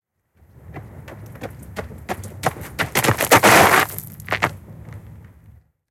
Footsteps Gravel Running-Stop
Running footsteps on gravel. Slippery stop.
Running, Footsteps, Gravel